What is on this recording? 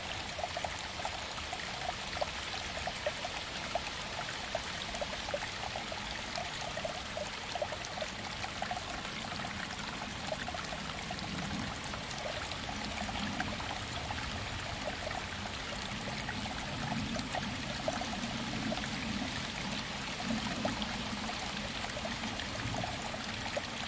Recording of a small pound and streams present in Tony Neuman`s Park, Luxembourg.